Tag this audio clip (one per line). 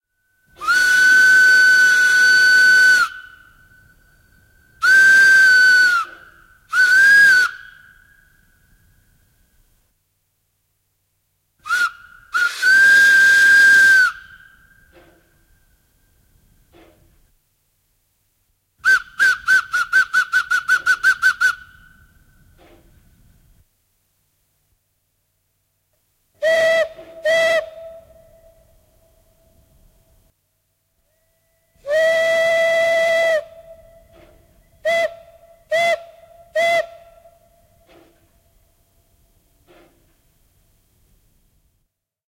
Yle
Steam-train
Train
Field-Recording
Vihellys
Tehosteet
Junat
Rautatie
Soundfx
Suomi
Rail-traffic
Railway
Juna
Yleisradio
Finland
Whistle
Raideliikenne
Trains
Finnish-Broadcasting-Company